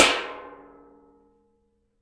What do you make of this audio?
a metal tray is struck with a metal ruler. recorded with a condenser mic. cropped and normalized in ReZound. grouped into resonant (RES), less resonant (lesRES), and least resonant (leaRES).